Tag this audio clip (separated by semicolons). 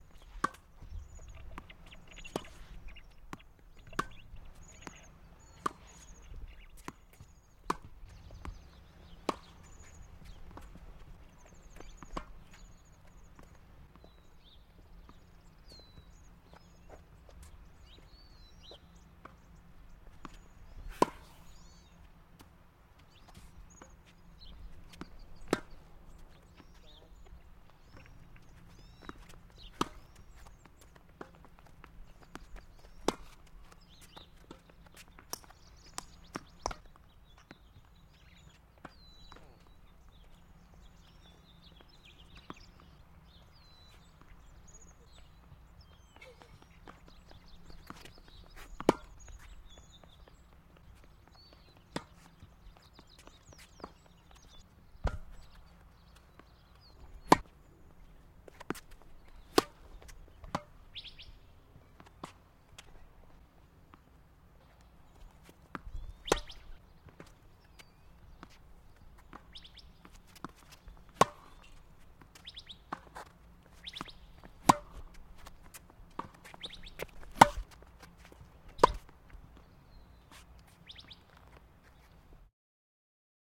hitting-balls; tennis